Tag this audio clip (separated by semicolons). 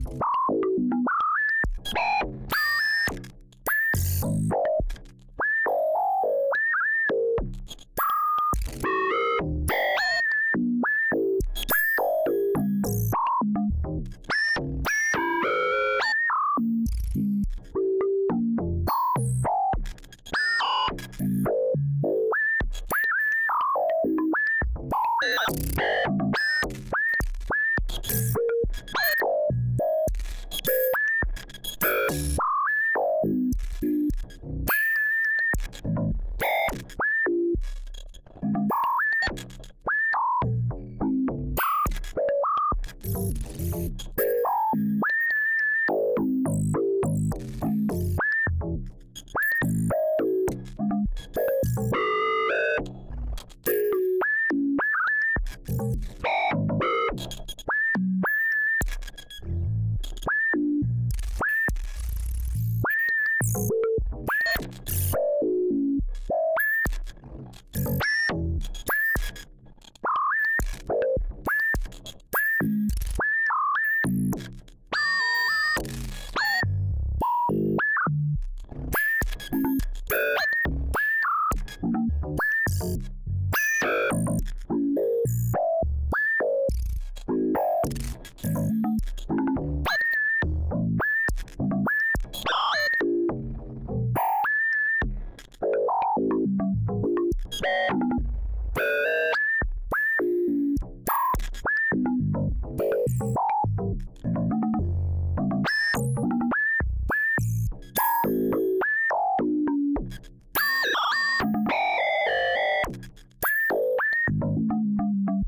FM; Synthetic; Synth; doepfer; Noise; Bell; Synthetizer; west-coast-synthesis; Burst; noodle; modular